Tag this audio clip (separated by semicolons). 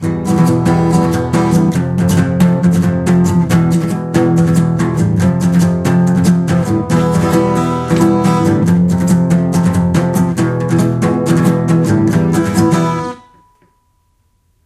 flamenco; acoustic